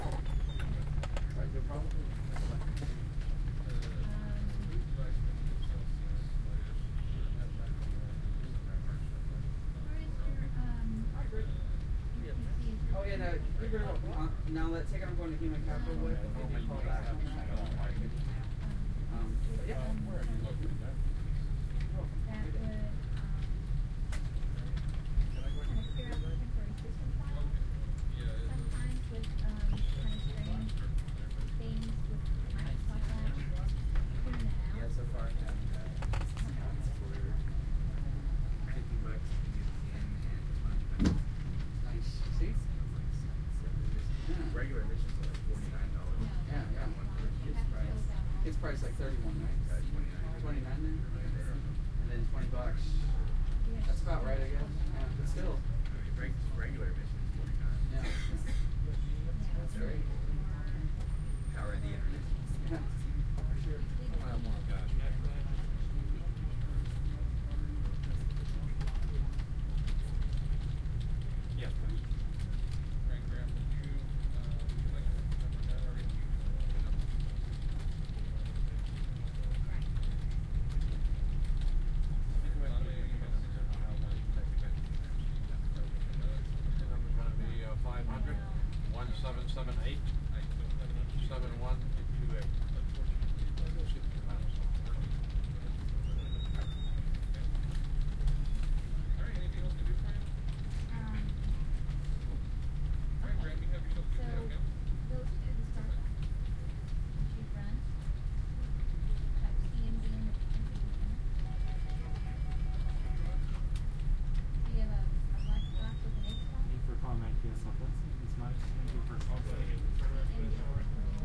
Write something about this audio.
A short clip of the helpdesk. Best if you listen with headphones since it is a binaural recording. This is a little on the quiet side so raise your volume a little.
Recording chain: SP-TFB-2 -->sony HI mini disc recorder